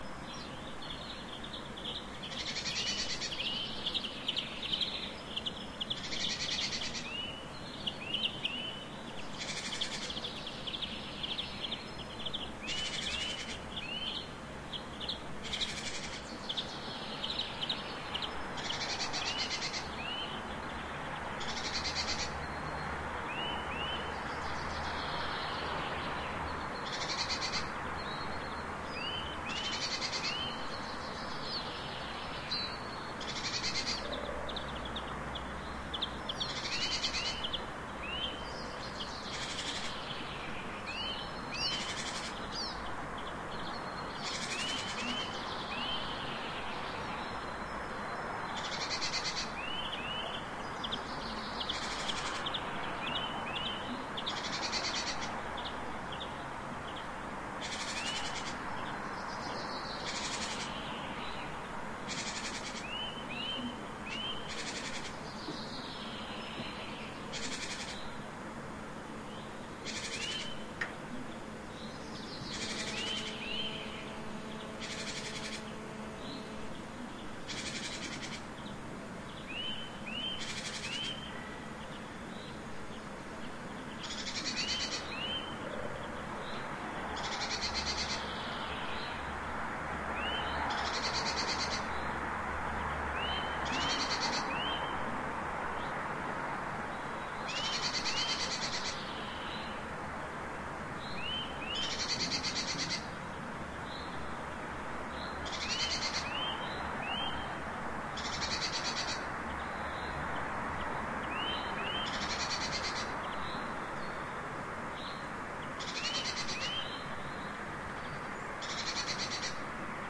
garden01 6 channel
Recorded with Zoom H2 at 7:30 am. Near street-noice with several birds
6channel, birds, garden, morning